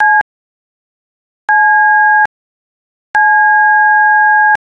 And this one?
The 'C' key on a telephone keypad.
button, c, dial, dtmf, key, keypad, telephone, tones